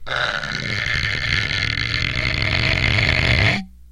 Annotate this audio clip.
long.scratch.03
friction, instrument, daxophone, idiophone, wood